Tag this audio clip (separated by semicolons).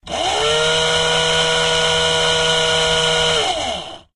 hand-drill; machine; mechanical; sound-effects; tools